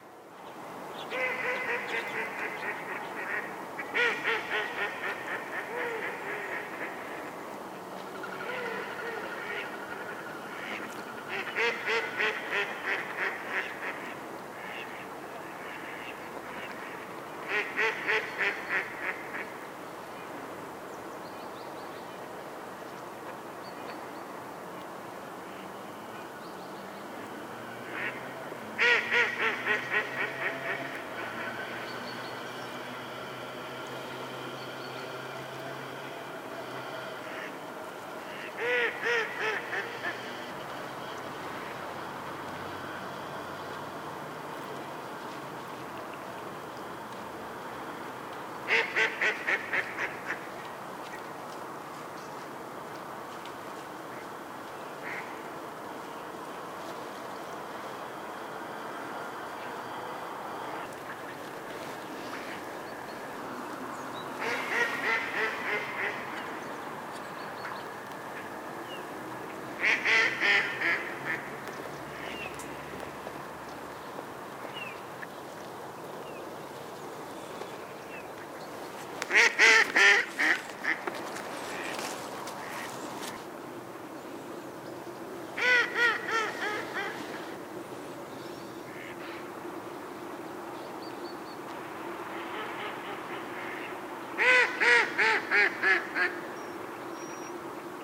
Mallards at the bay.
recorded from the beach with an omnidirectional microphone(Line audios OM1) in mono
with Zoom F4. Has cut away some spaces between the ladders.
bird, birds, F4, field-recording, Line-audio, mallard, mallards, nature, om1, seaside, zoom